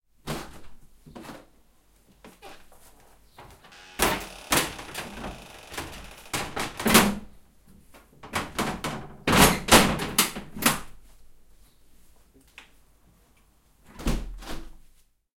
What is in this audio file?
SHUTTERS CLOSING – 2
Sound of shutters closing (with also the sound of the window). Sound recorded with a ZOOM H4N Pro.
Son de fermeture de volets (avec le bruit de l’ouverture et de la fermeture de la fenêtre également). Son enregistré avec un ZOOM H4N Pro.
house, open, opening, room, shutter, shutters, shuuters-opening, window, windows